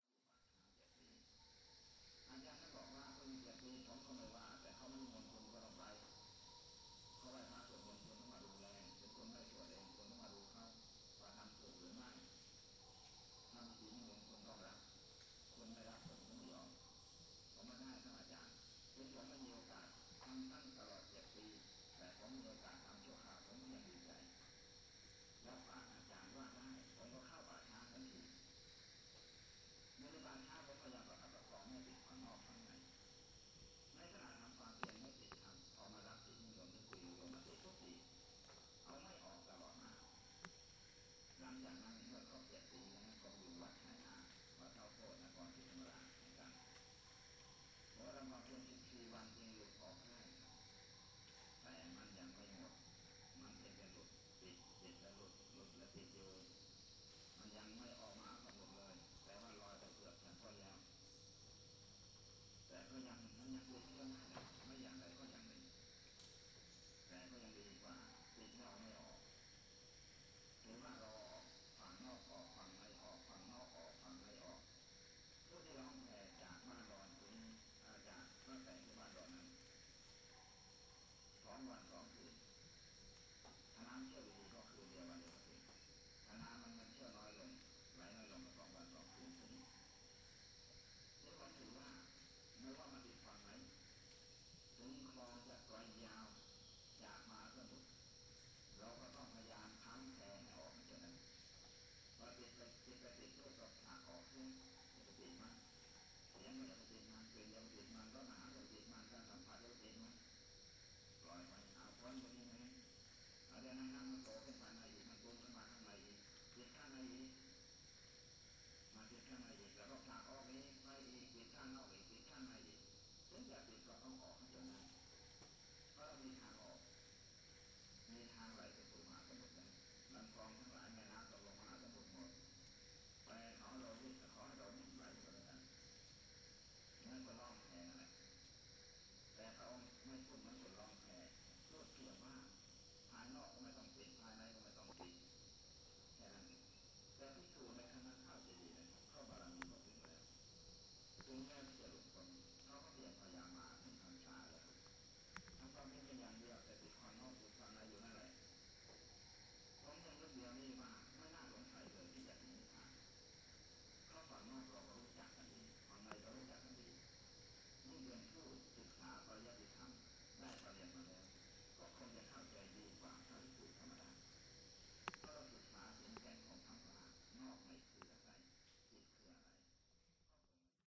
Thai Jungle Monk
A monk patrolling a temple while listening to a radio.
field-recording, Jungle, Monk, Thailand